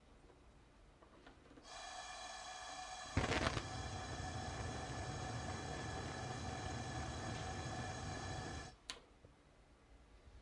A gas burner igniting
burner, gas, stove, fire